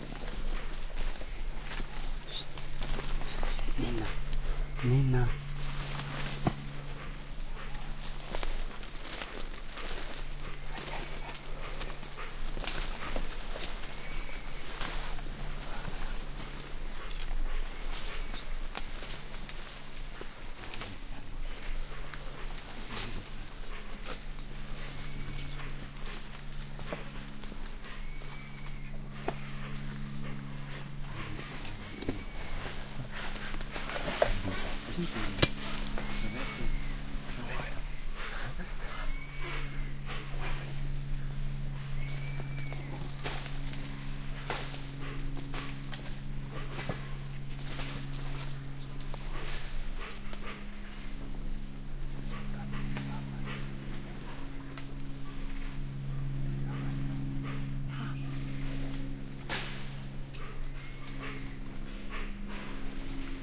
Atmo Jungle Hunting 13h00m 11.07.201148k
Recorded in 2011 on the documentary "Arutam" project. With a Rode Stereo XY mic thru a Boom. This one was recorded on a hunting with the indians